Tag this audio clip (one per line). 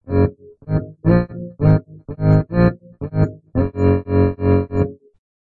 charang
filtered
goldwave